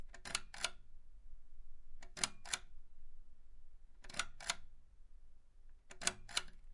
Turning LampOn
The sound that a desk lamp makes when the metallic cord is pulled to turn it on.
desk, switch-on